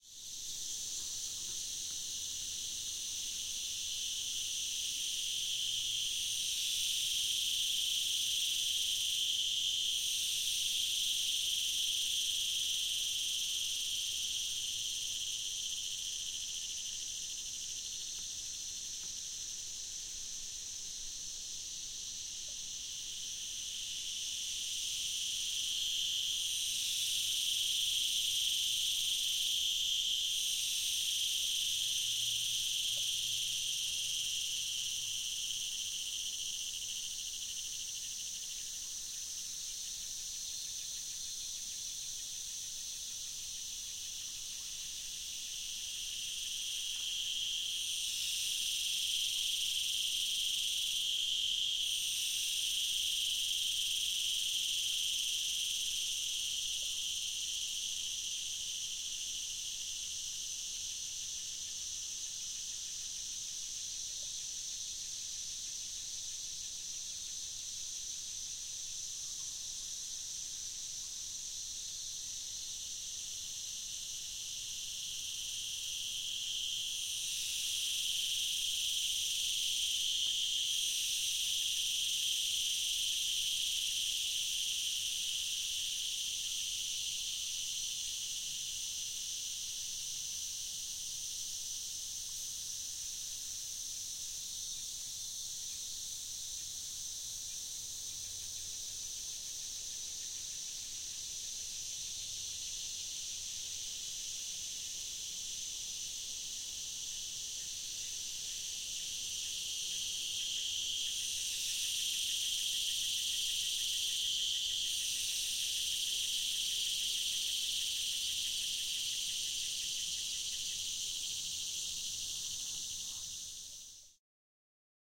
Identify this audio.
This was recorded in 2009 in a forest near Mulu in Sarawak, Malaysia (Borneo island).